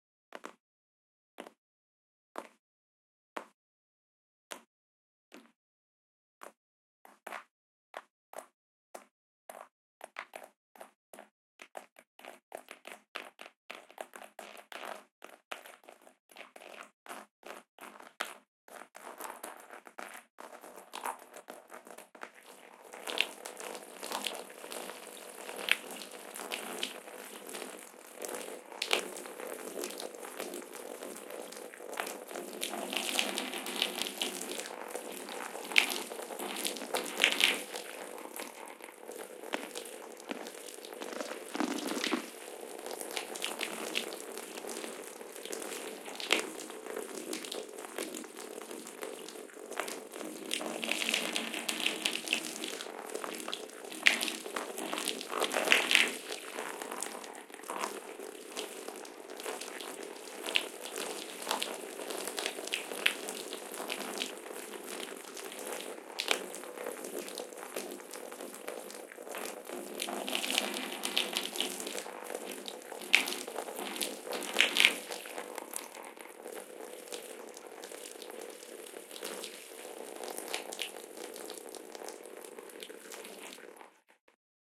Dripping Gushing Water Sequence
Water dripping on wet towel in bathtub from turkey baster; Then stream of water being poured onto towel. Tascam DR-05. Processed using some noise gating and EQ filtering Logic 10.5.
Pouring, Foley, Sound, Water, EFX, Dripping